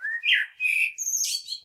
Morning song of a common blackbird, one bird, one recording, with a H4, denoising with Audacity.